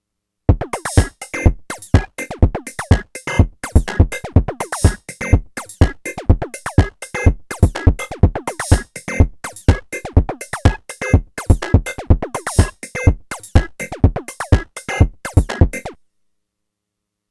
mm sidbox.01-demo
Short techno beat demo of all the sounds on my sidbox.01 kit.
Programmed and sequenced on an Elektron Monomachine SFX-60, using only SID 6581 machines.
*No compression, limiting, EQ added*
c64, beat, sid, lofi, techno, 6581, monomachine, analog